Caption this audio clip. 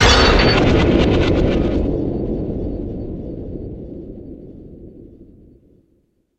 Morph transforms sound effect 2
morph, background, impact, noise, abstract, futuristic, cinematic, rise, metalic, dark, transformer, stinger, scary, destruction, hit, transformation, transition, metal, woosh, atmosphere, drone, opening, horror, game, moves, Sci-fi, glitch